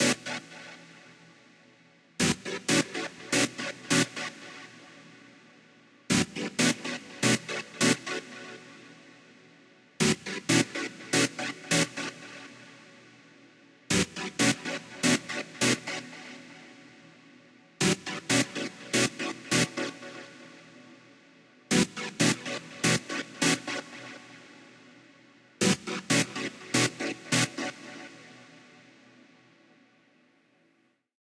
An energetic chord shot repeated several times. Sounds like throwing some sonic fluid in space.
Both synthetic and organic.
Would fit for house or trance or any kind of music as long as you like synthesizers.
123bpm
House, Trance, Shot, Saturation, Power, Deep, Energy, Synth, Chords, Burst, Tape, Wide, 123bpm, Punch, Loud, Character, Crunch, Pad